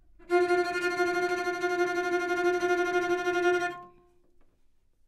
Cello - F4 - bad-dynamics-tremolo
Part of the Good-sounds dataset of monophonic instrumental sounds.
instrument::cello
note::F
octave::4
midi note::53
good-sounds-id::2038
Intentionally played as an example of bad-dynamics-tremolo
good-sounds, neumann-U87, single-note, multisample, F4, cello